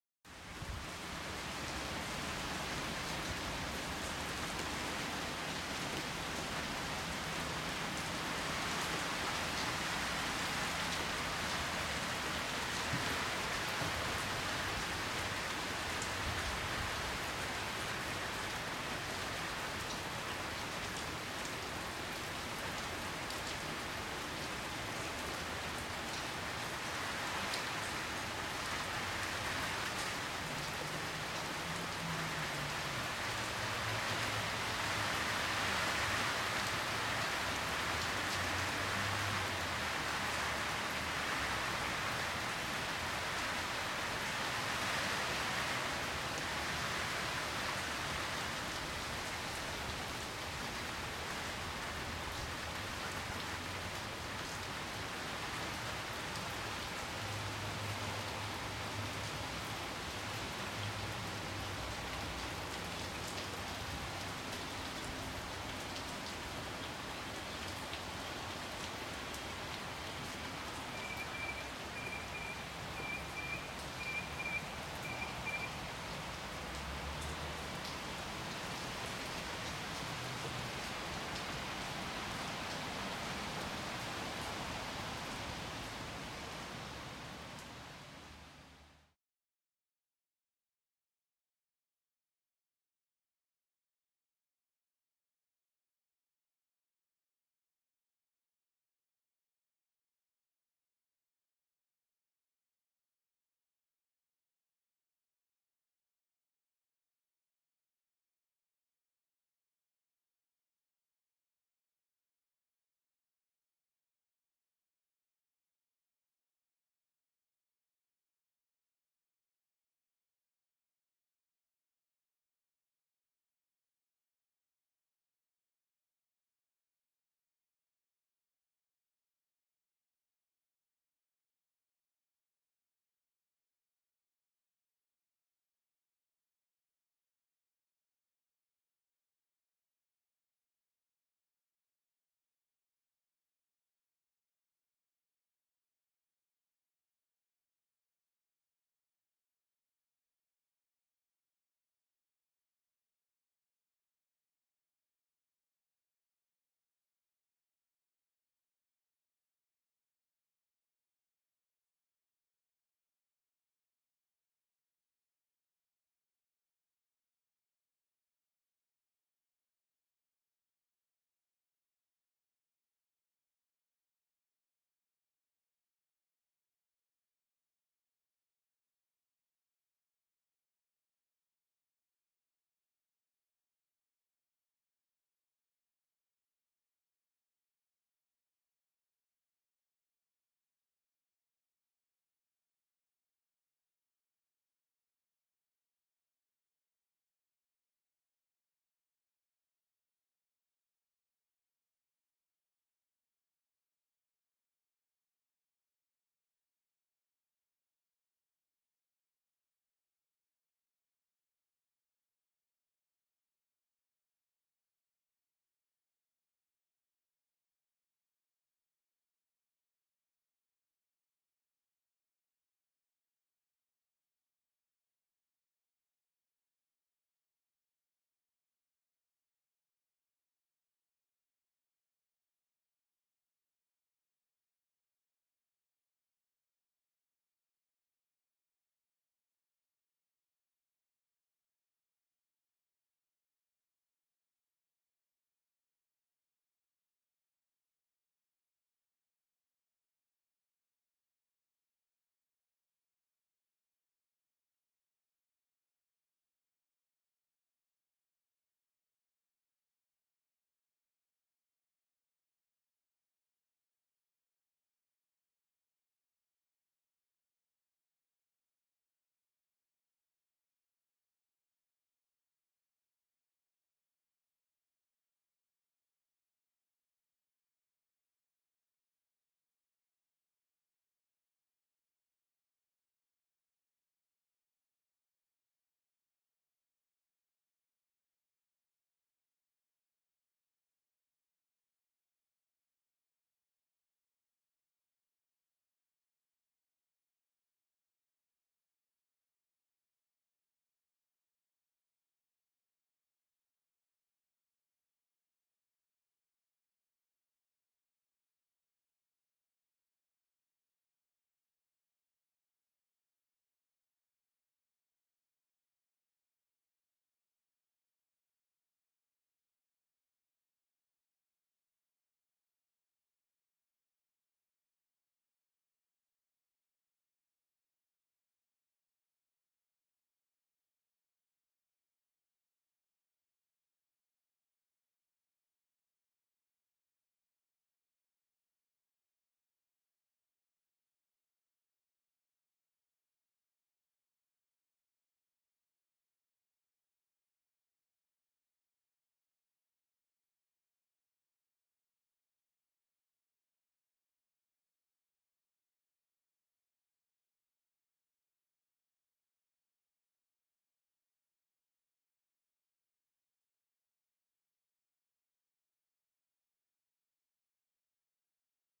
Light rain
weather, background, ambience, soundscape, background-sound, field-recording, atmos, ambient, ambiance, atmosphere, atmo, rain